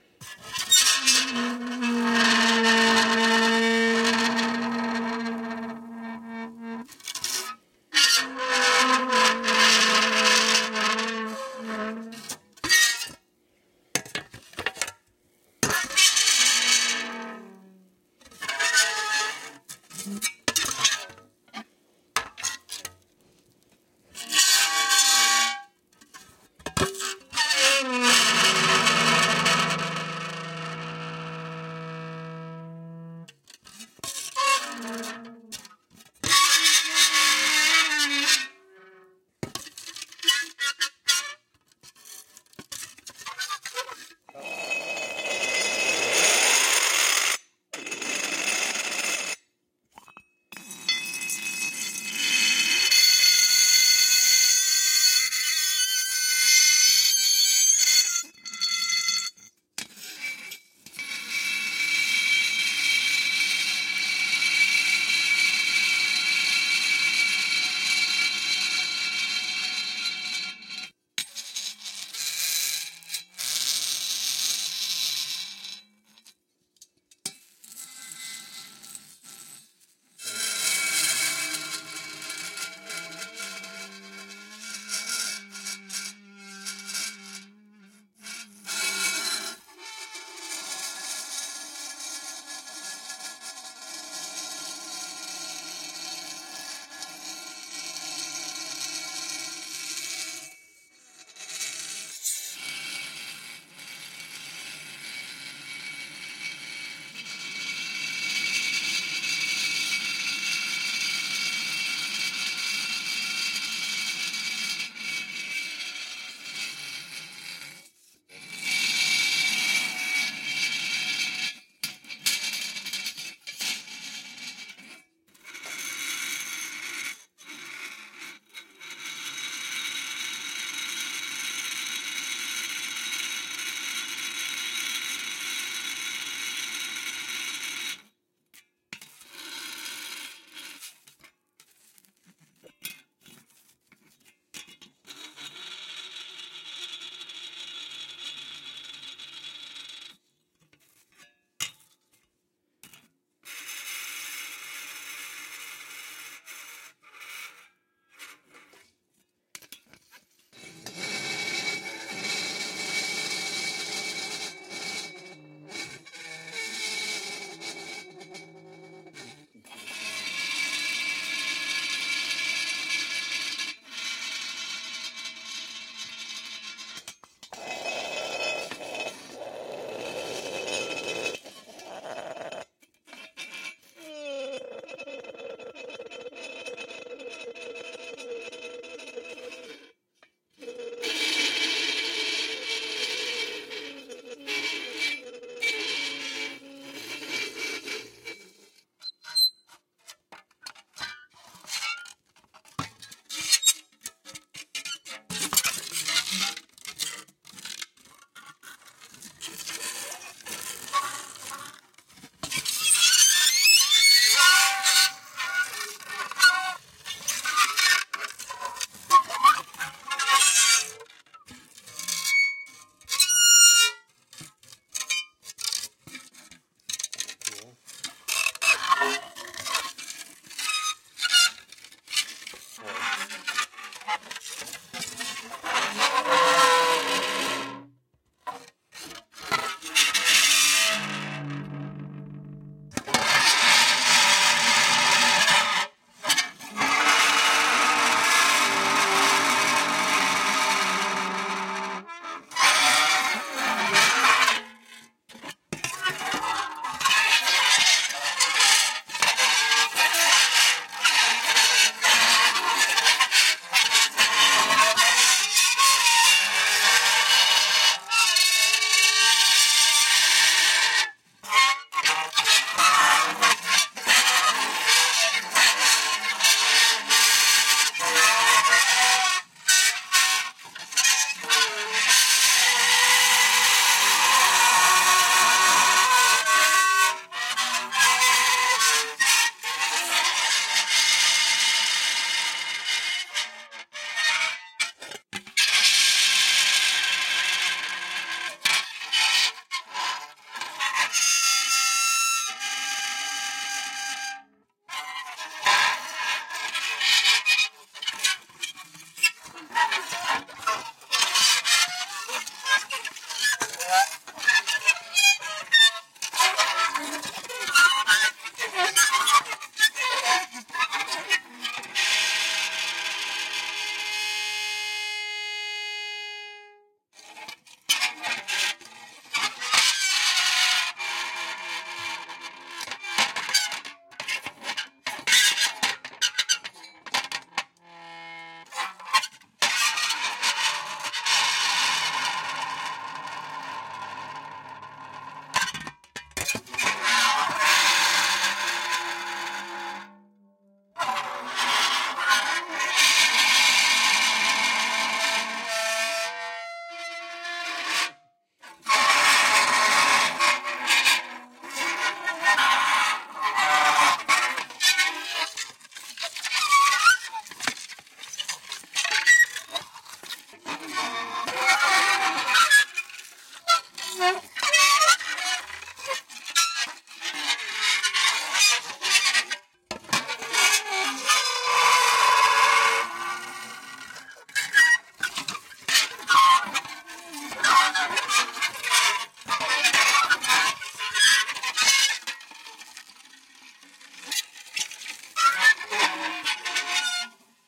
Dry Ice coming in contact with various metals makes for some seriously spooky sounds. Part of the Six Days of Sound Effects library.